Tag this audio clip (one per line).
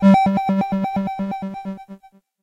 synth
wobble
vl-1
adsr
casio
vl-tone
vintage